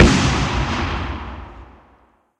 cannon boom2
a single explosion.
boom, explosion, cannon